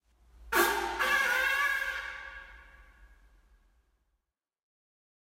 Endurance Fart
Real farts with some natural reverb. Recorded with a fucked up iPhone 7 in a disgusting screwed up pub. As always I was dead drunk and farted away on the lovely toilets there.